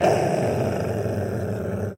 Goblin Snarl
Goblin monster attacking, threatening the RPG player character in a video game sound effect.
aggressive, angry, bark, barking, beast, creepy, dink, dog, enemy, freedink, game, goblin, growl, growling, monster, roar, role-playing, rpg, scream, snarl, snarling, video-game